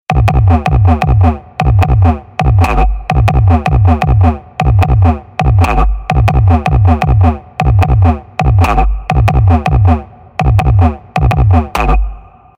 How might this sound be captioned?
Drum Team